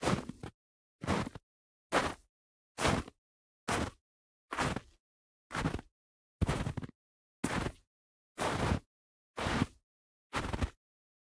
Footsteps Walk (x12)- Moutain Boots - Snow.
Gear : Tascam DR-05